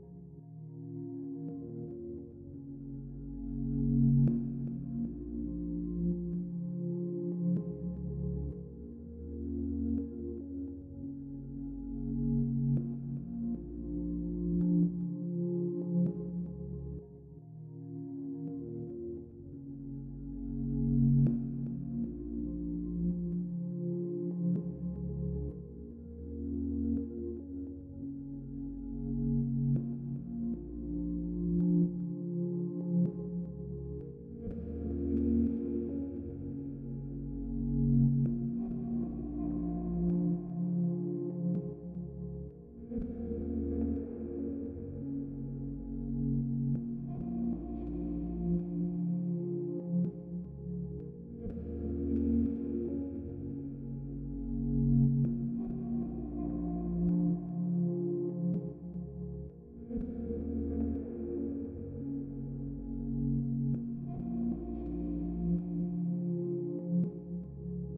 Dark Synth
Some Synth noises I made for a dark house remix.
Dark, elctronic, Cinematic, Free, Ambient, Operator